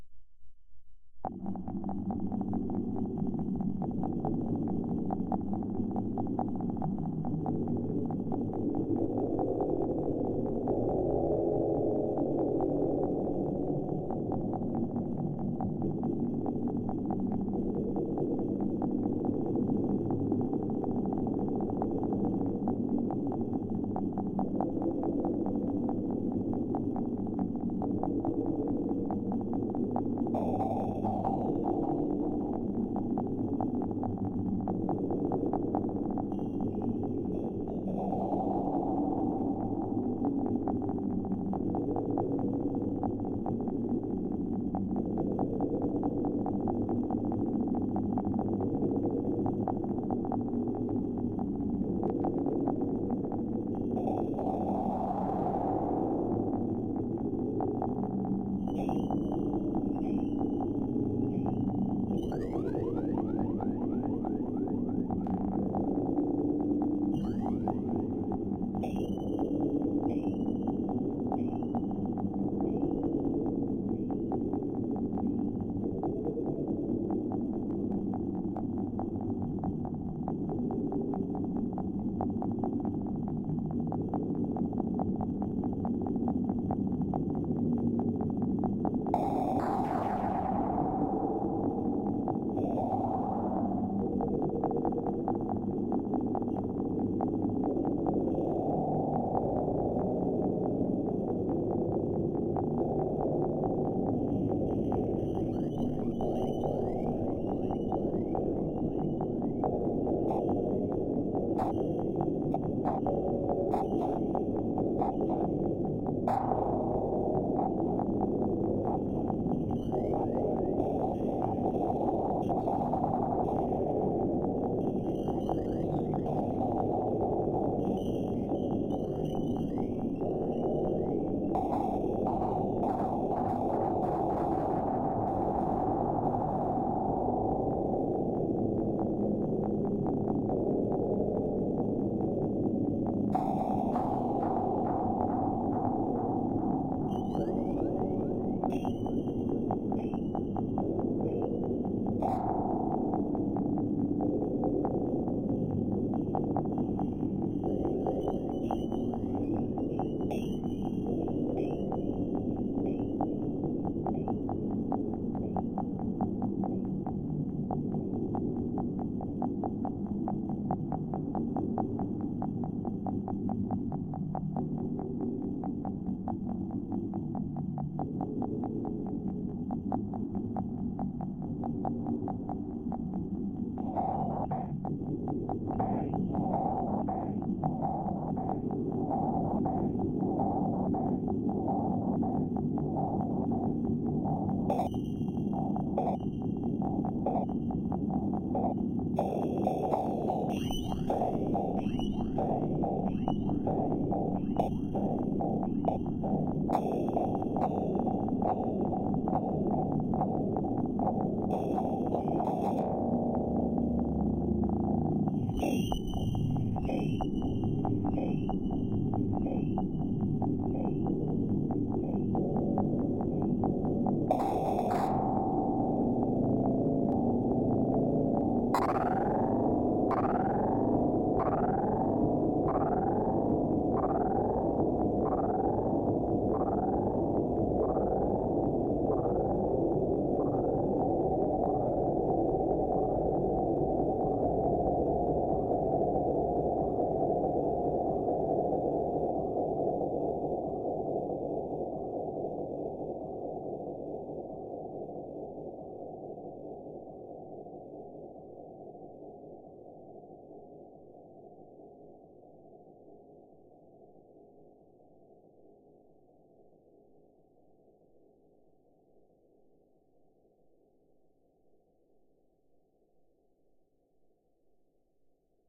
Tech Background
Background used for video games and movies.
Link me what you used it in.
ambient, background, creepy, cyberpunk, echo, reverb, sci-fi, technology, unearthly